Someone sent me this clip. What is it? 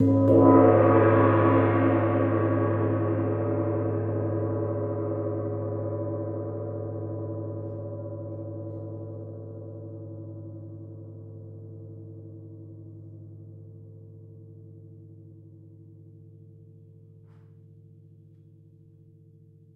Medium loud reverb 2
A medium-loud gong strike sample with a good bit of reverb
Gong-strike, Reverb